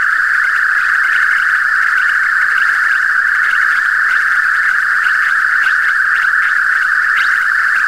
SonicDeathRay 1.2KHzWithCrackle
This is SonicDeathRay_1.2KHzNoCrackle with some "crackle" added, though admittedly the start and end of the loop have been adjusted, so it's not an exact match. The crackly noise that is added is just a different twist on the idea, to make it seem like the "ray" or destructive beam is actually doing something to something. Here is the description from the other: A seamless loop of a continuous beam sound along the lines of how it was imagined for old sci-fi movies, meaning multiple cross-modulated oscillators. This one has a peak of frequencies in the 1200 Hz range, extending up to 1800 Hz, so it is extremely annoying. This was created in an Analog Box circuit, which makes it very easy to tweak all the parameters, then turned into a loop with just a bit of editing in Cool Edit Pro.
abox, B-movie, cracle, dangerous, death-ray, laser, noisy, ray-gun, sci-fi, synthetic, vintage